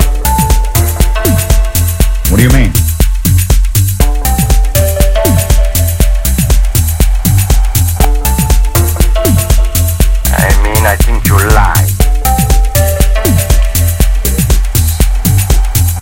Techno loop with voice samples. 8 Bars. Someone is not to be believed!